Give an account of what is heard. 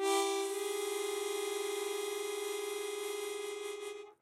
Chromatic Harmonica 2
A chromatic harmonica recorded in mono with my AKG C214 on my stairs.
chromatic, harmonica